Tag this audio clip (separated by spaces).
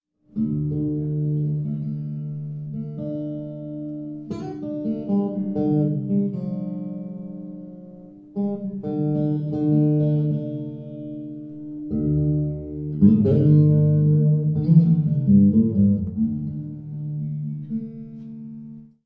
guitar tuning